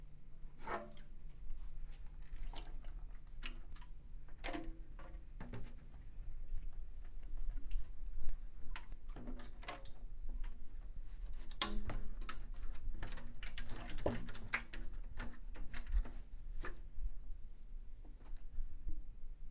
Sloshing water jug
A near empty water jug being moved, sloshing the few drops of water left around.